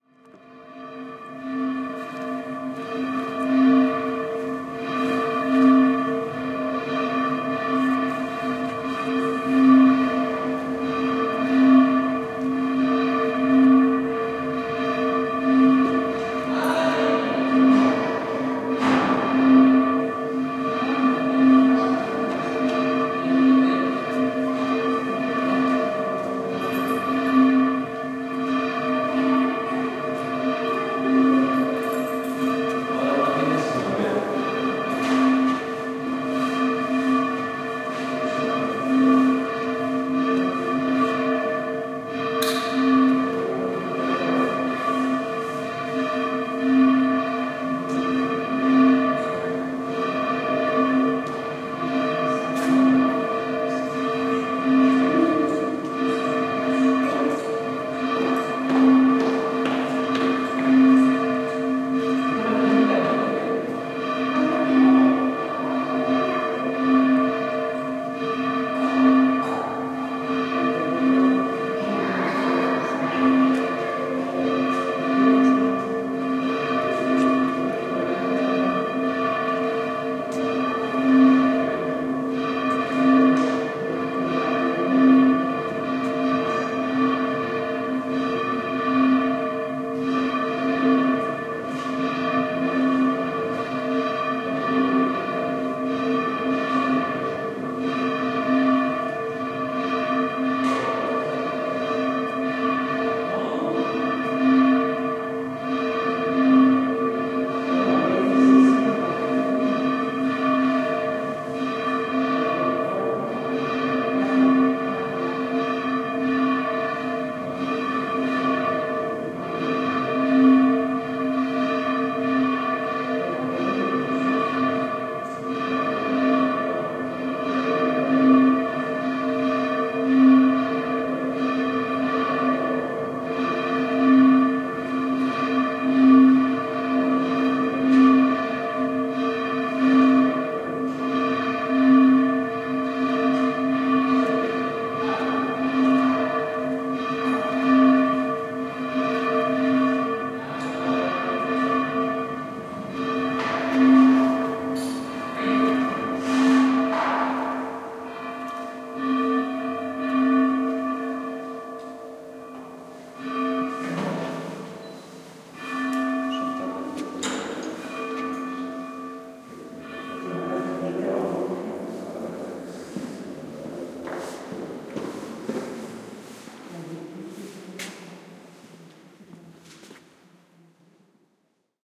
Pealing church bells, recorded from the inside of the building, with some voices in background. Primo EM172 capsules into FEL Microphone Amplifier BMA2, PCM-M10 recorder. Registered inside the 16th century Church of Nuestra Señora de la Asuncion (right below the impressive altarpiece by Luis de Morales, wow!